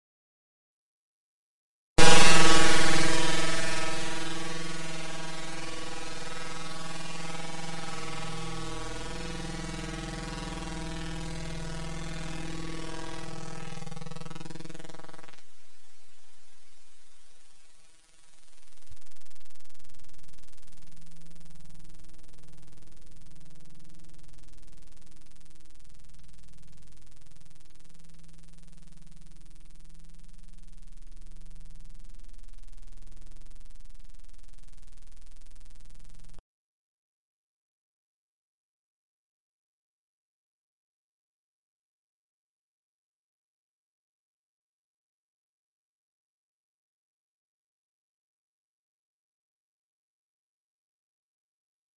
Sounds intended for a sound experiment.
derived from this sound:
Descriptions will be updated to show what processing was done to each sound, but only when the experiment is over.
To participate in the sound experiment:
a) listen to this sound and the original sound.
b) Consider which one sounds more unpleasant. Then enter a comment for this sound using the scores below.
c) You should enter a comment with one of the following scores:
1 - if the new sound is much more unpleasant than the original sound
2 - If the new sound is somewhat more unpleasant than the original sound
3 - If the sounds are equally unpleasant. If you cannot decide which sound is more unpleasant after listening to the sounds twice, then please choose this one.
4 - The original sound was more unpleasant
5 - The original sound was much more unplesant.
sound-experiment, Dare-26, experimental